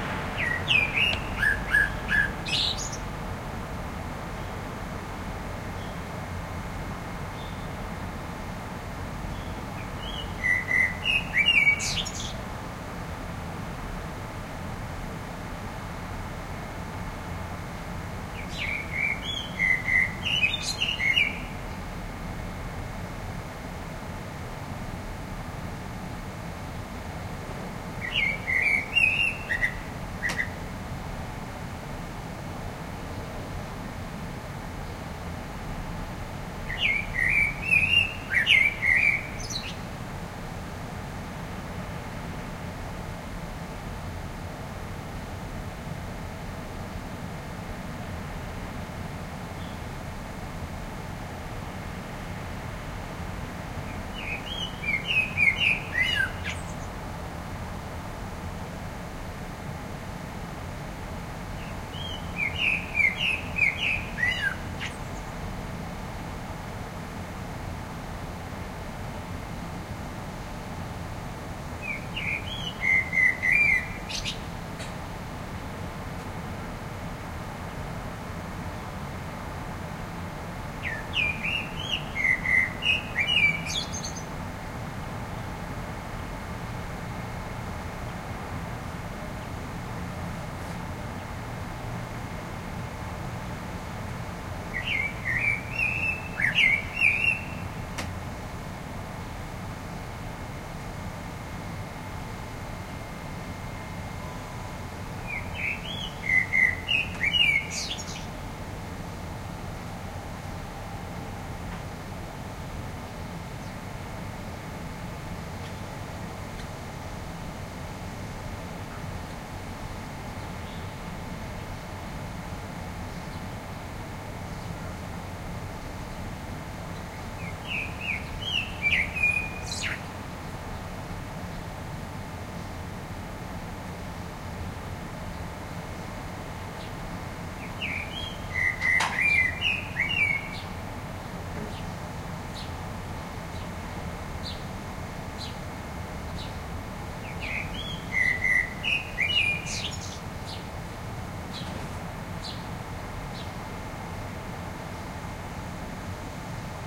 20100307.city.blackbird
Blackbird singing at dawn (or was it the dead of night?), on top of a roof, plus distant traffic rumble. Recorded on a chilly sunday morning near Gran Via (Madrid, Spain). Olympus LS10 internal mics